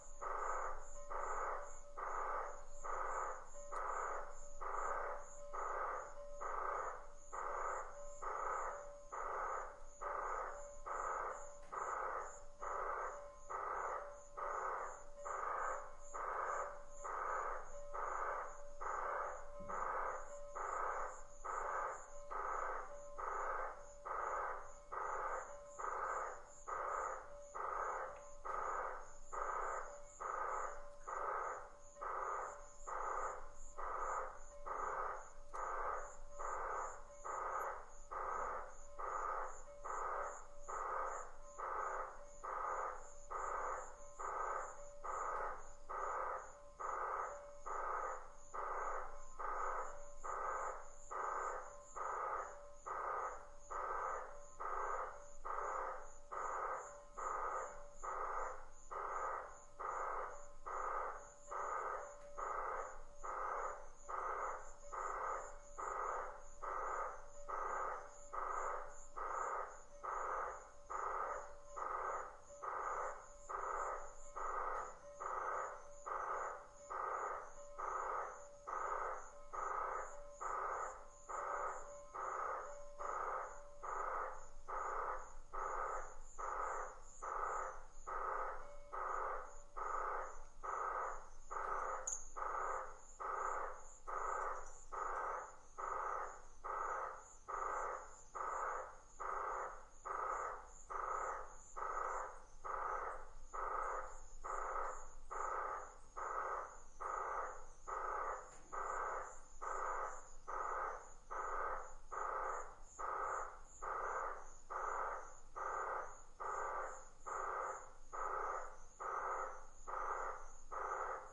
AMB Ghana Frogs Interior Ringy 1 LB
Weird interior recording of Frogs in rural Ghana, Strange metallic ringing
Frogs, Ghana, Africa